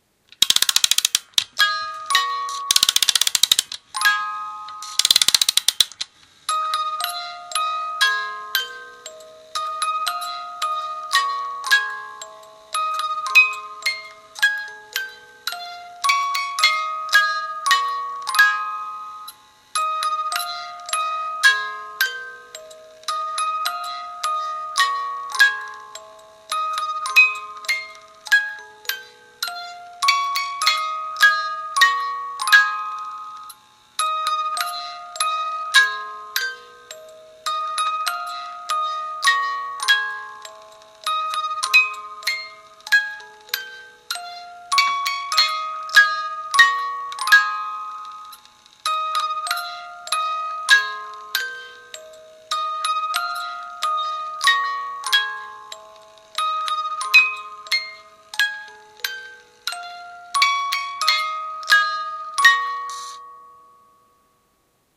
HAPPY BIRTHDAY MUSIC BOX
A hand-cranked music box mechanism extracted from the base of a tin of 'Birthday Biscuits'.
Musical-box, Hand-crank, Wind-up-and-play, Music-box